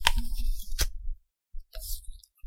Sofia Apple 001
My daughter bitting an apple.
fruit, chewing, munching, crunch, food, apple, bite